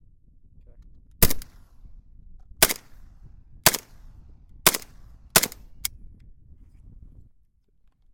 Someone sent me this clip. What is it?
An AK-47 firing a few times
Recorded with a Tascam dr-05